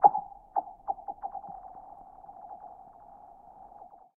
this sample is part of the icefield-library. i used a pair of soundman okm2 mics as contact microphones which i fixed to the surface of a frozen lake, then recorded the sounds made by throwing or skimming several stones and pebbles across the ice. wonderful effects can also be achieved by filtering or timestretching the files.